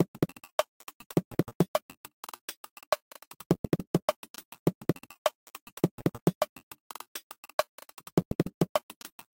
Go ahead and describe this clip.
Glitchy Steps 102bpm
loop, drum